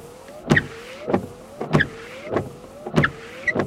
car windshield wipers seamless loop 1 fast
New car windshield wiping sounds. Fast variation. Seamless loop.
Recorded with Edirol R-1 & Sennheiser ME66.